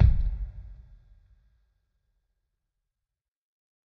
Kick Of God Bed 030
drum, god, home, kick, kit, pack, record, trash